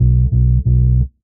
Modern Roots Reggae 14 090 Bmin A Samples
14, Roots, 090, Modern, Samples, A, Bmin, Reggae